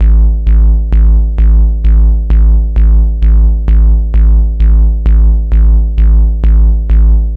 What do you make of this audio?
Samples recorded from an ARP 2600 synth.
More Infos: